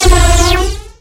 Phasing Beam Variation 01
Used FL-Studio 6 XXL for this sound.
Just modified the "Fruity Kick" plugin and Modified it with lots of Filters,Phasing and Flange effects.
This Sample comes in 3 variations.